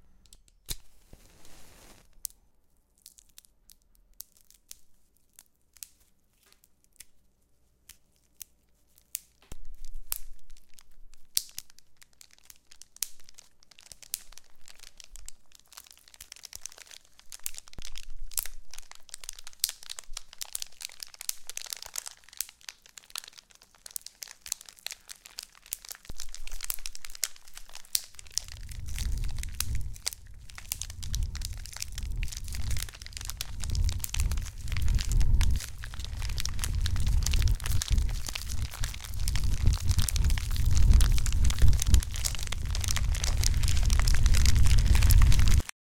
This is a combination of plastic crackling, paper ripping and crumbling, sticks cracking and twisting, matches lighting, and a gas stove. All of which are moderately EQed and mixed in ProTools, but nothing is heavily processed. What is left is the illusion of a building fire.